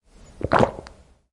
swallowing water
me swallowing a gulp of water
recorded on Tascam DR-40x
swallowing, water